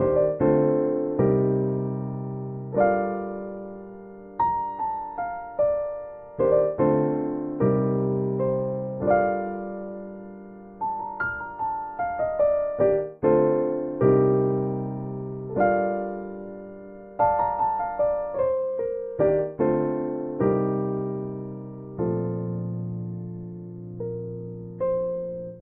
Street Gospel Hip Hip Piano - 75bpm - Bbmaj
bright, cool, gospel, happy, hip-hop, hiphop, jcole, keys, lo-fi, lofi, loop, lupe, miguel, piano, smooth